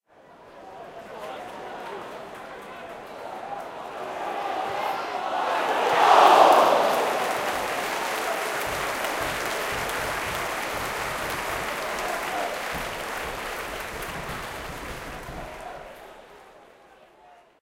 Football-crowd-near-miss-from-freekick
I needed small stadium sound effects for a play about the local football club, Brentford FC. The club, very graciously, gave me free access around the ground to home matches early in the season 2006/7. This is an extract from Brentford's game with Bristol City.